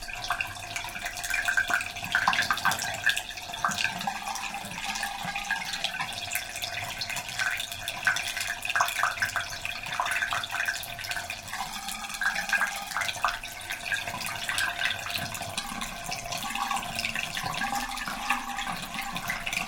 Water gurgling bath overflow-hole.